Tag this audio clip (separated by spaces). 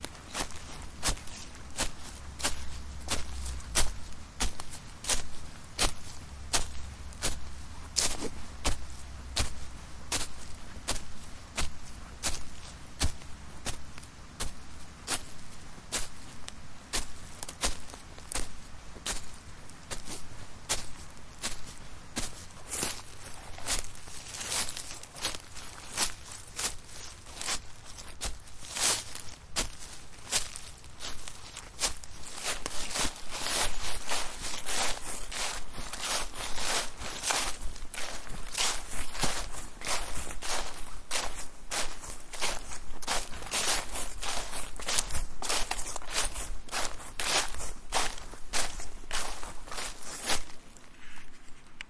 footsteps forest footstep leaves walking walk step feuilles steps foot wood march marche feet nature